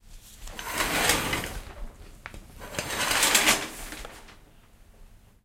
shower curtains
shower curtain noise